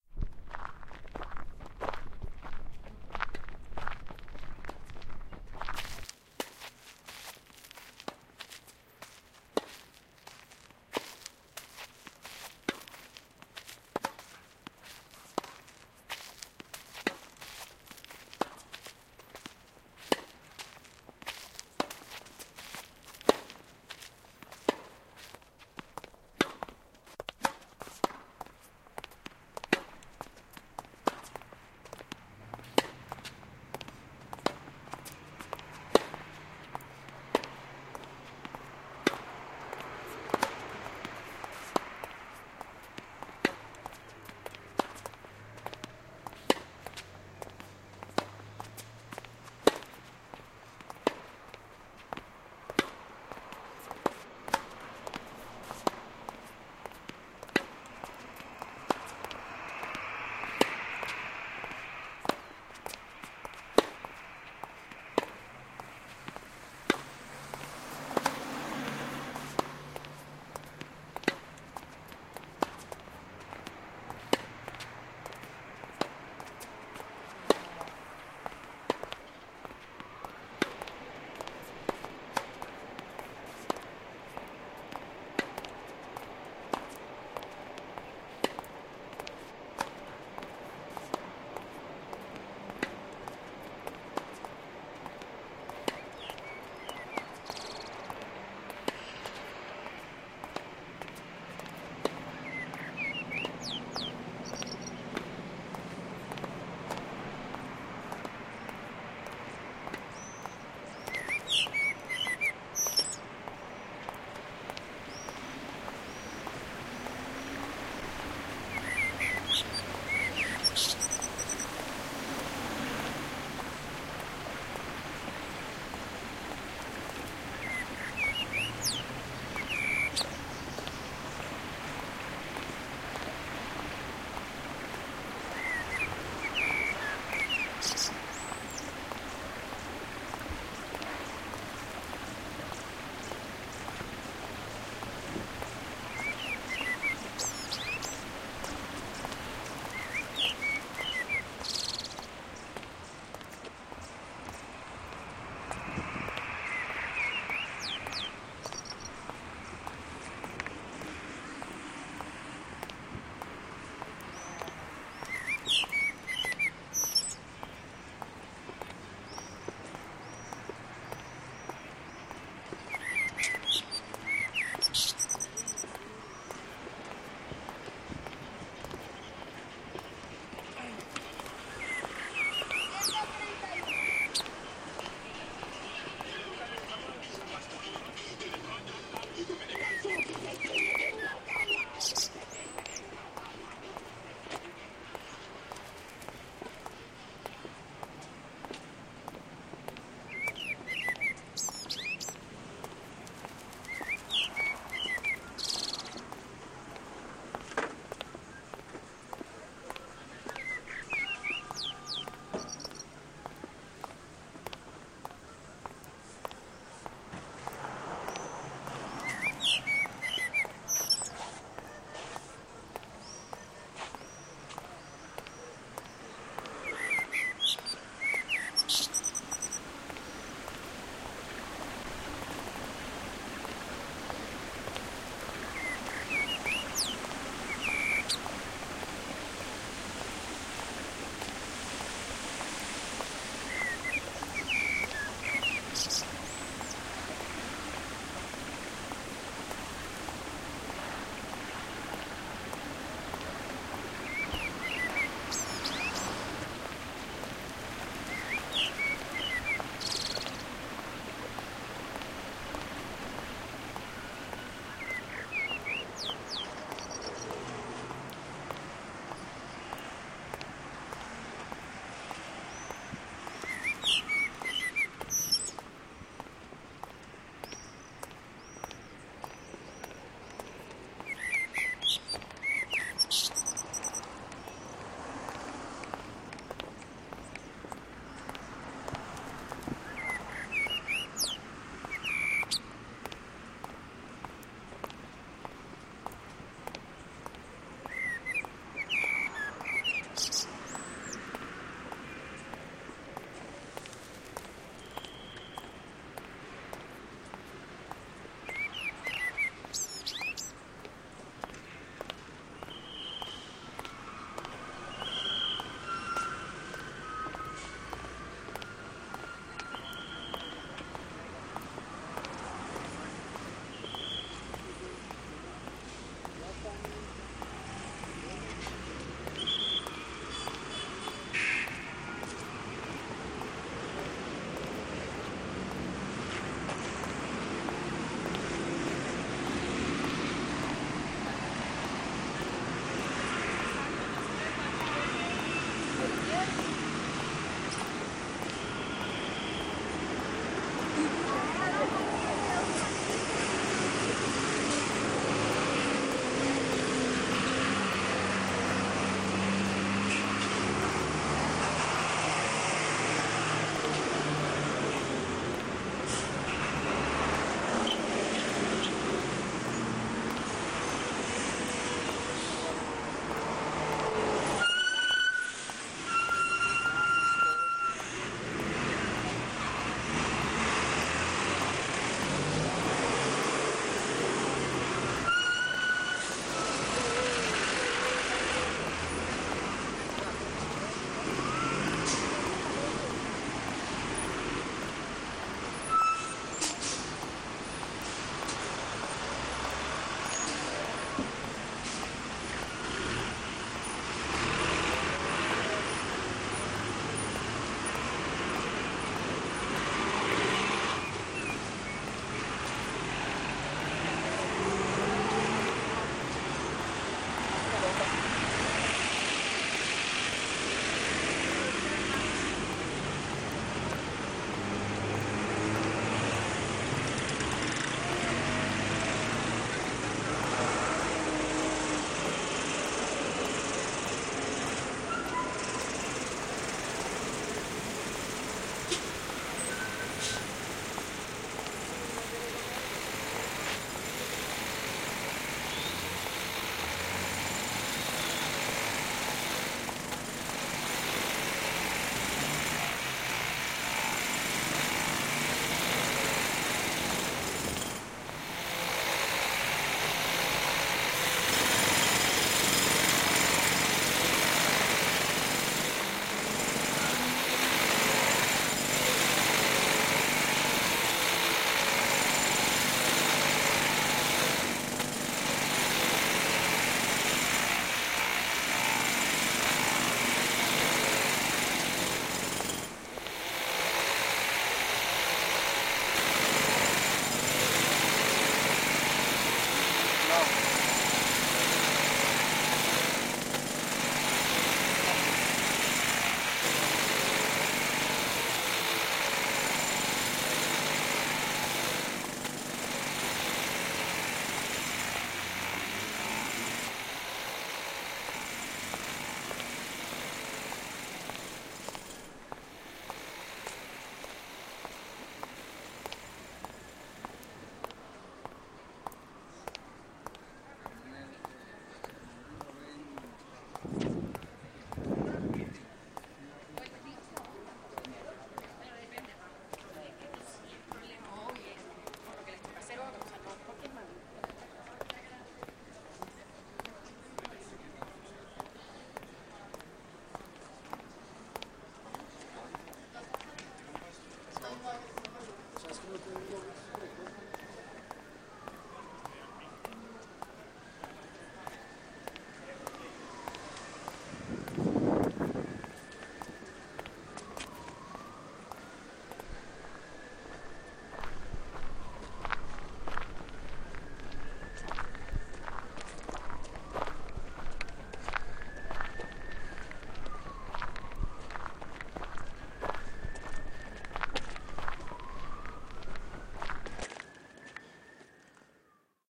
recorrido-leo
Based on Janet Cardiff´s audio walks, Leonardo did a field recording of a walk nearby the school and edited an audio file.